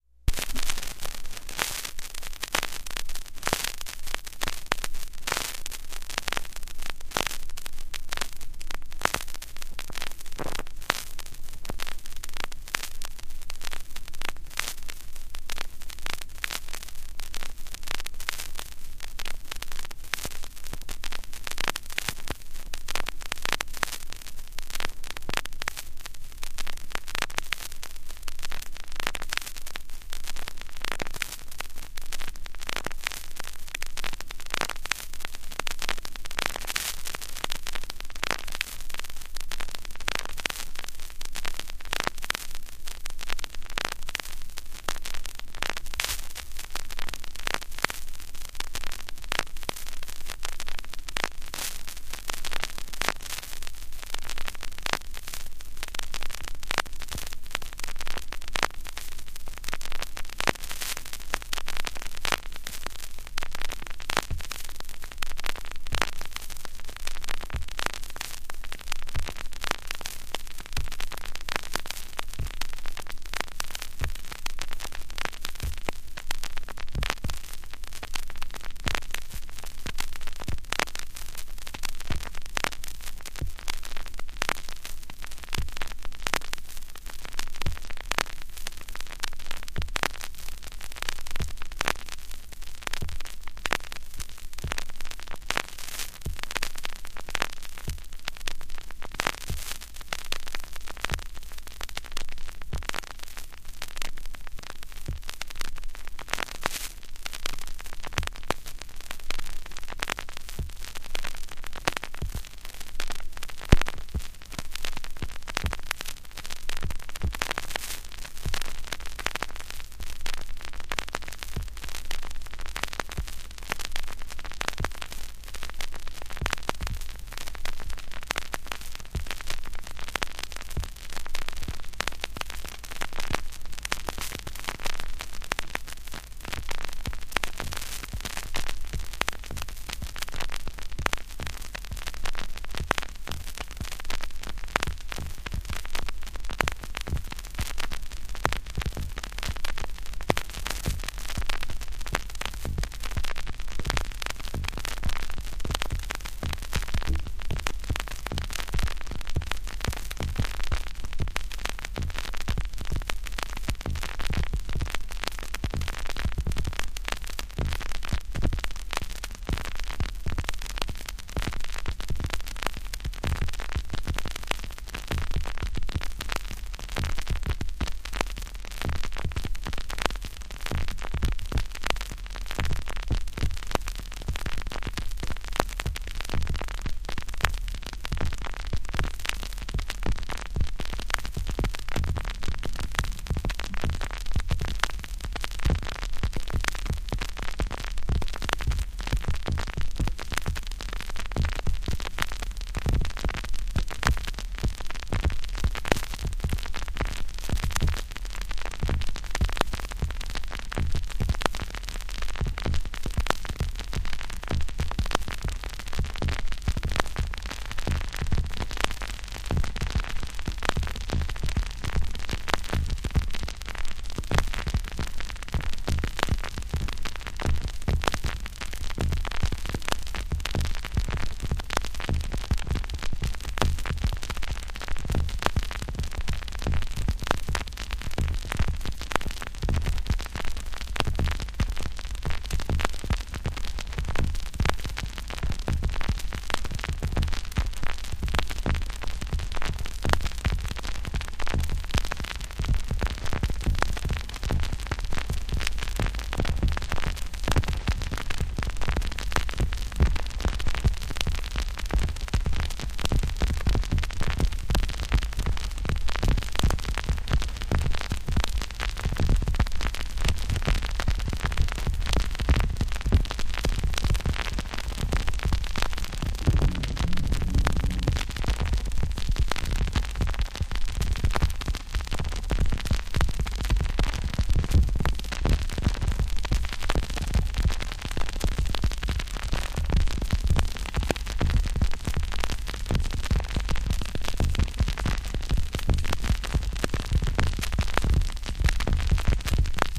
Repeating vinyl crackle, taken from a record without a cover (b. 1955)